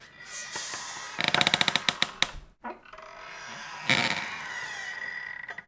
Recording of a creaking door in a small room. Recorded with a Zoom H4N recorder, cleaned up in Audacity (44.1 16 bit).
CC 0 so do as you want!